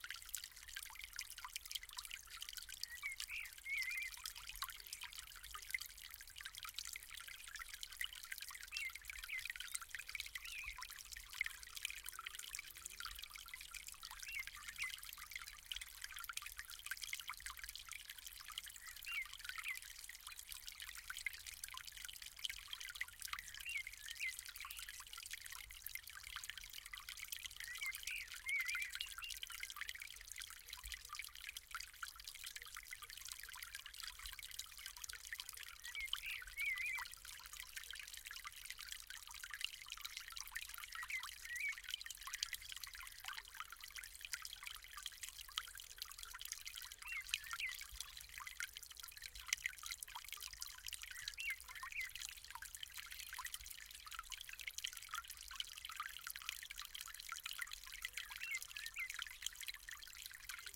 Sound of water streaming down in storm water channel.